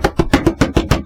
drum on table
me banging on my desk excitedly after getting passed night 4 on FNaF
bang, drum, hand, table